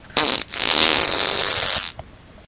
bumble bee fart
flatulation, flatulence, fart, laser, gas, aliens, weird, explosion, poot, noise